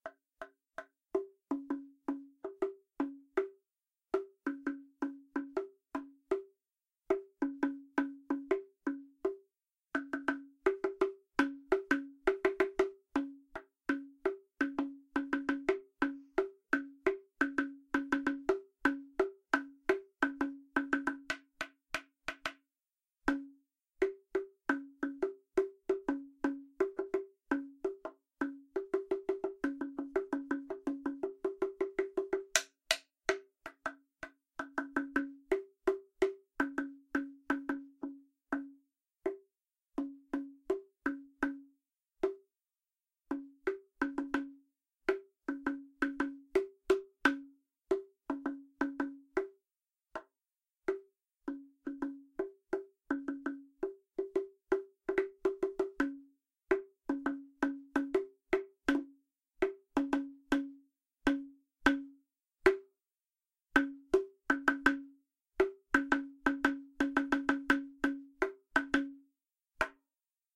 A wooden Guatemalan drum being played clunkily.
drum, Guatemalan, improvised, percussion, rhythm, wood